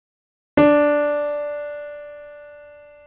Note "Re" played by a piano